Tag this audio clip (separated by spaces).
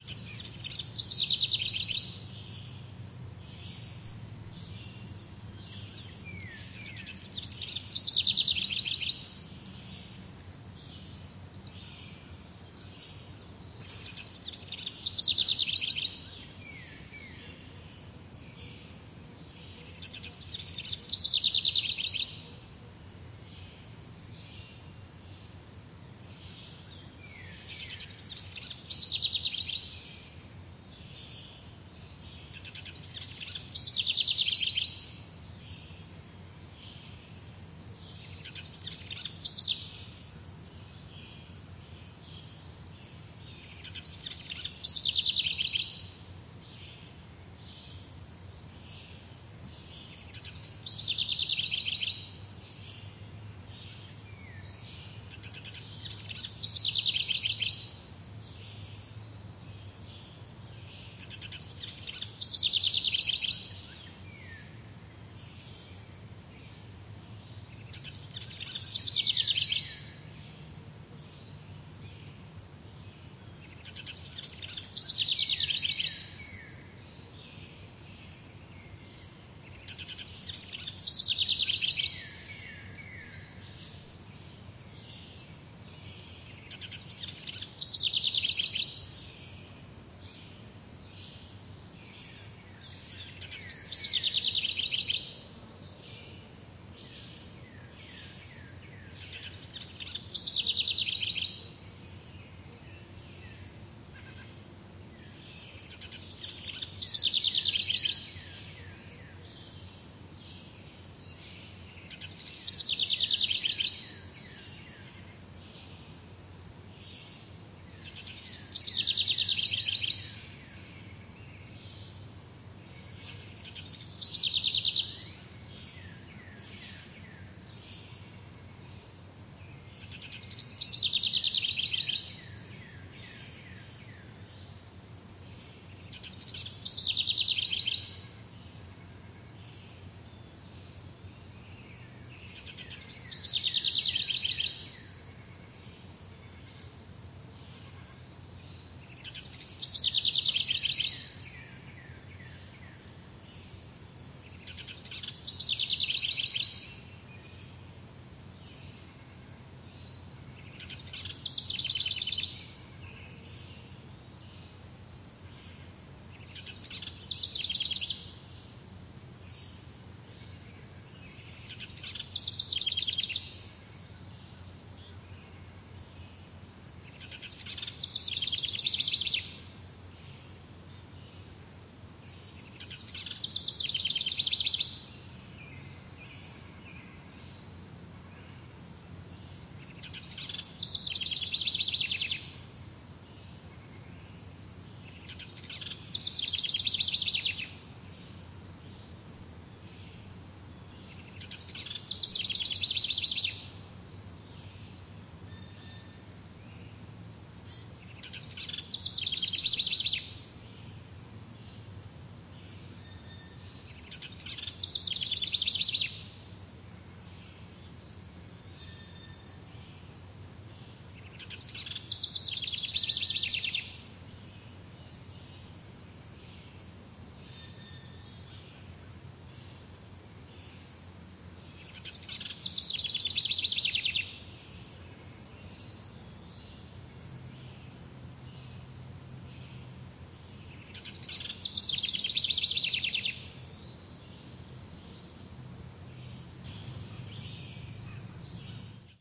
ambient birds forest nature